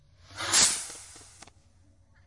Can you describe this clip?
Fireworks recorded using a combination of Tascam DR-05 onboard mics and Tascam DR-60 using a stereo pair of lavalier mics and a Sennheiser MD421. I removed some voices with Izotope RX 5, then added some low punch and high crispness with EQ.
fireworks, whiz, crackle, bang